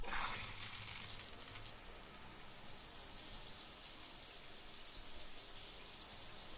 SHOWER ON 1-2
Shower water running
bathroom, shower, water